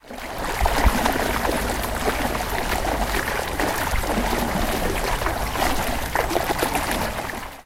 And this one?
en aquest audio s'escolta l'aigua de l'estany del parc de la solidaritat
el-prat, stream, park, aigua, deltasona, water, pound